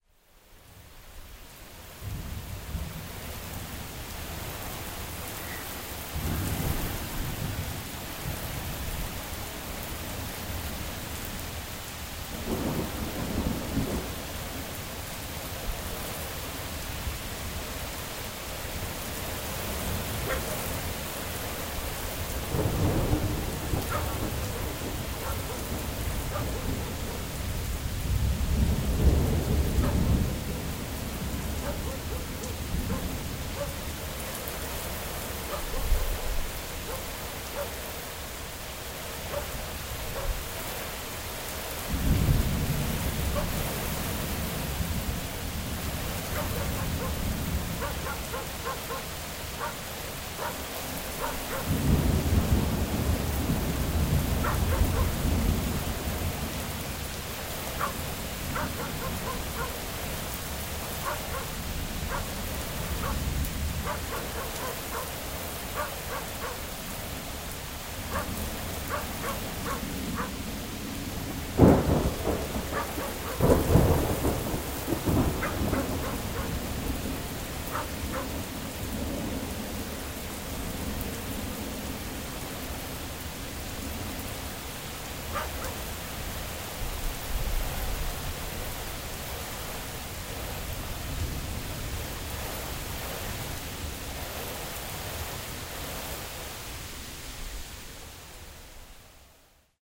Idiot dog in thunderstorm
While recording a thunderstorm, this idiot dog walks by. He sees me holding my microphone out of my open garage and then starts barking. Not sure who looked more foolish. Him barking at me in the wind and rain, or me trying to wave him off. Recorded on June 18th 2006 in North Texas with Sony ECM-99 to Sony MD